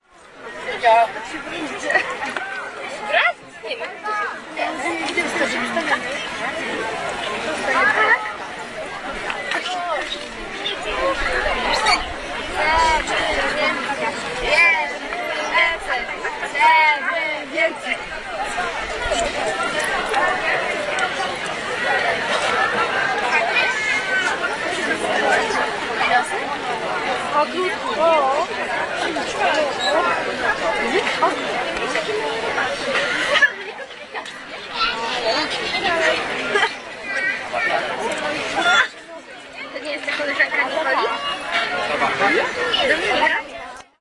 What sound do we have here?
day of strzalkowo waiting for pupils performance270610
27.06.2010: the Day of Strzalkowo village (village in Wielkopolska region in Poland). I was there because I conduct the ethnographic-journalist research about cultural activity for Ministerstwo Kultury i Dziedzictwa Narodowego (Polish Ministry of Culture and National Heritage). the Day of Strzalkowo is an annual fair but this year it was connected with two anniversaries (anniv. of local collective bank and local self-government).
the sounds produced by crowd waiting for the kindergarten pupils performance.